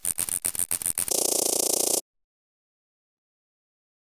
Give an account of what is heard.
insects noise 007
A short electronic noise loosely based on insects.
ambience, ambient, chirp, electronic, evening, field, insect, morning, noise, pond, synthetic, water